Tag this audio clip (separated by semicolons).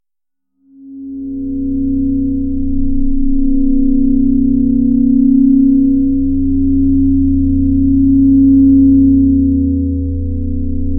pink-noise; noise; distortion